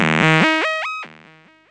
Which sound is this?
generate with my hardware
experimental Techno, wonky techno
c64, chiptune, commodore, sid, video-game